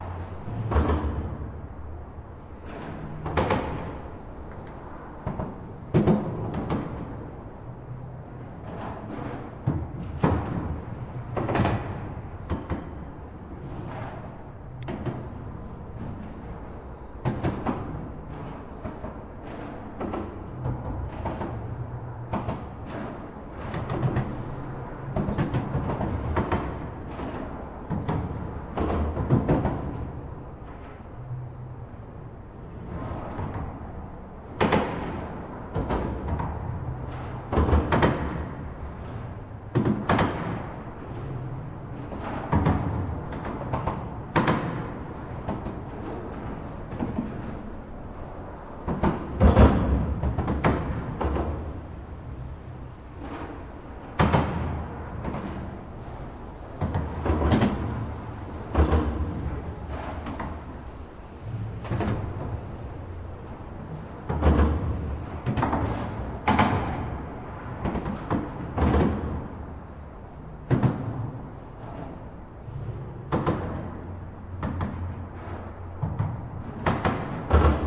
under Leningradskiy bridge1
The roar of a bridge, when the cars drive over the bridge. Left river-side.
Recorded 2012-09-29 04:15 pm.